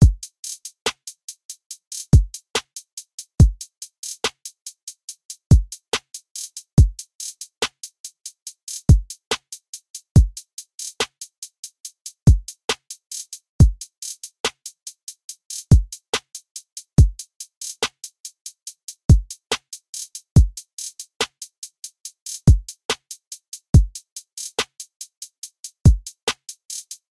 Hip-Hop Drum Loop - 142bpm
Hip-hop drum loop at 142bpm
hat, drum